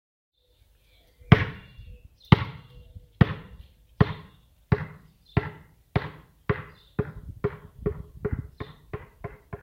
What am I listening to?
The sound of a basketball repeatedly hitting the ground